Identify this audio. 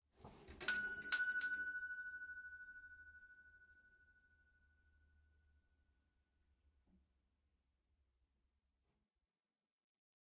Doorbell Pull with pull Store Bell 05
Old fashioned doorbell pulled with lever, recorded in old house from 1890
Store, Pull, Doorbell